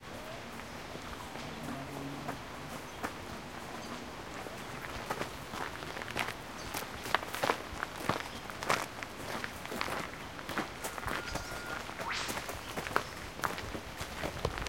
20110714 Skywalk footsteps whip bird
Bush walking in an Australian rainforest, soft chatter of other tourists, a distant river and call of the native whip bird.
Equipment: Zoom H2
Recorded at the Tamborine Rainforest Skywalk, Australia. July 14, 2011